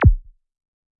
ambient psy goa kick 1 (bass 90 hz)
ambient, bass, bassdrum, bd, drum, goa, kick, psy, psybient, psychill